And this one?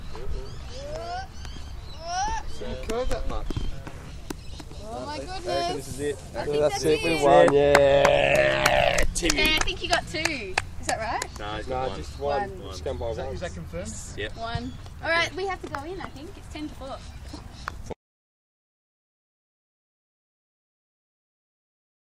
Recorded on an MP3 player using the voice recorder. Recorded at the Concord RSL Women's Bowling Club on a Sunday. Sound of people complementing bowler on their bowl.
ambient, australia, bowls, english, field, grass, lawn, recording, sport, talking, voice